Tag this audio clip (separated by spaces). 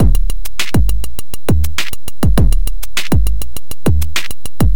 101bpm beat cheap distortion drum drum-loop drums engineering loop machine Monday mxr operator percussion-loop PO-12 pocket rhythm teenage